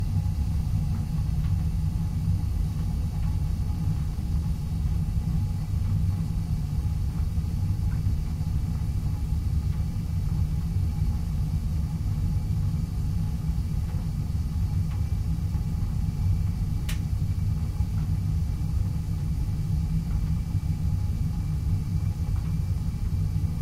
This is a loop of my gas water heater at work.